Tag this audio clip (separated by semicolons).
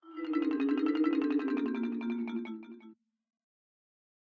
170bpm; Marimba; Thumble; Warped